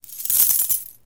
Coins Several 11
A simple coin sound useful for creating a nice tactile experience when picking up coins, purchasing, selling, ect.
Coin, Coins, Currency, Game, gamedev, gamedeveloping, games, gaming, Gold, indiedev, indiegamedev, Money, Purchase, Realistic, Sell, sfx, videogame, Video-Game, videogames